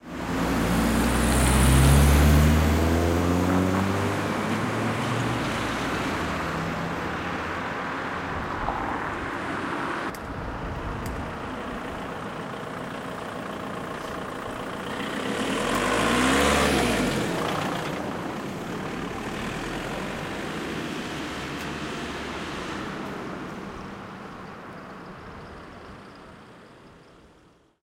delphis AMBIENT CAR edit
Cars driving in a curve